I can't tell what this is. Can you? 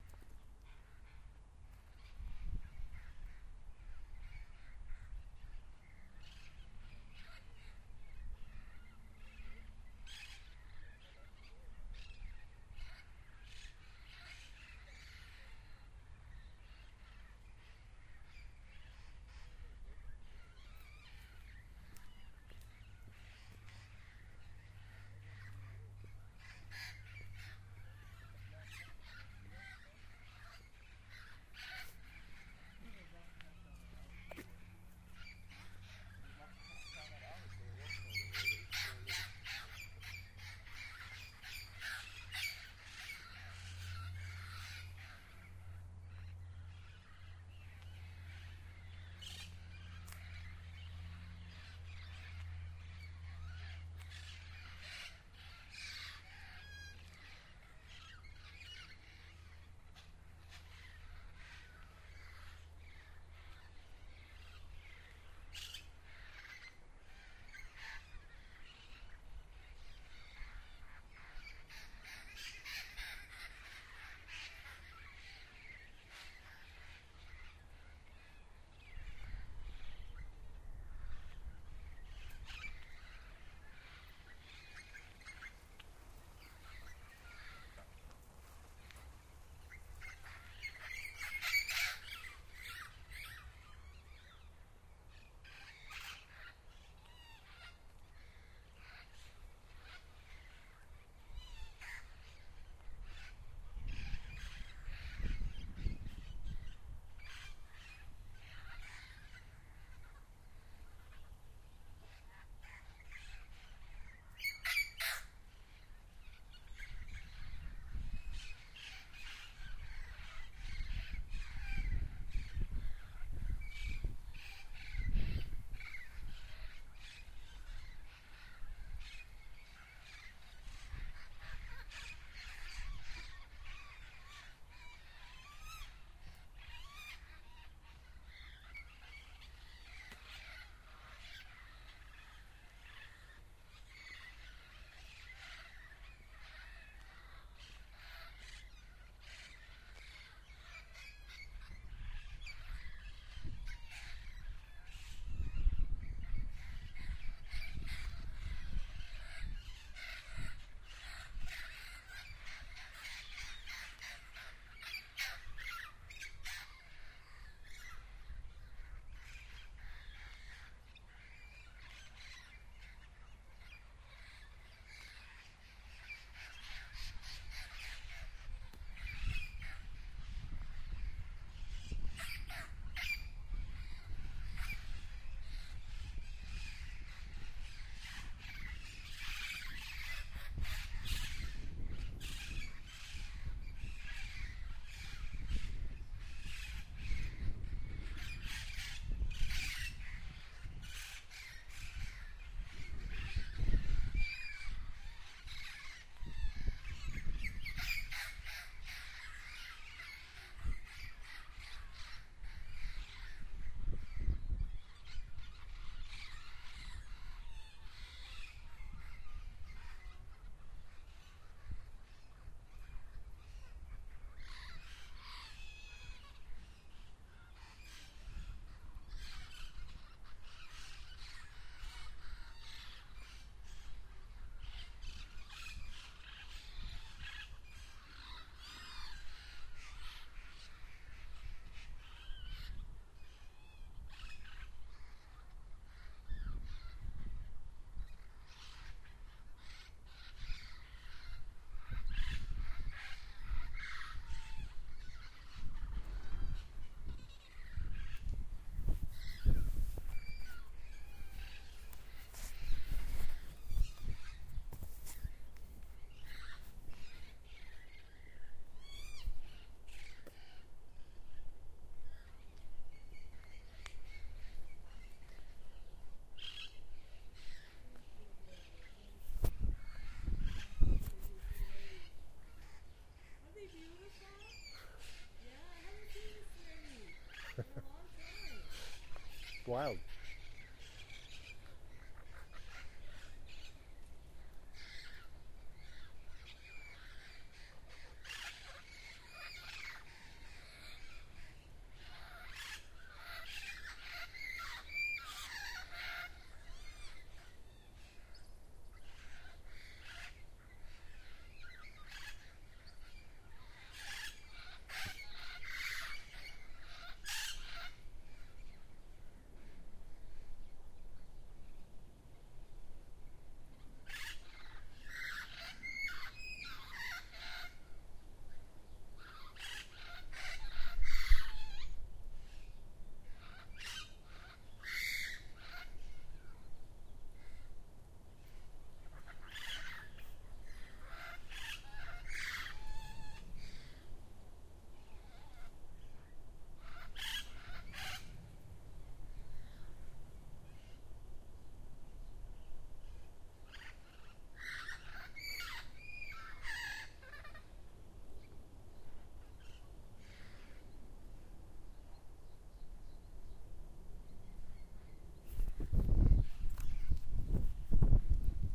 Yourell Free Parrots Arrive 1
Wild parrots started flying in and landing on the trees along Mission Bay in San Diego on a cool, cloudy day. I walk around and catch some individuals and groups. Sometimes they fly overhead. I did my best to minimize footstep sounds and wind noise. Mostly, I did a good job of that. No. 2 at about 2:35 there's a nice group after a long silence, so don't quit beforehand. The stereo mics add to the liveliness of the sounds.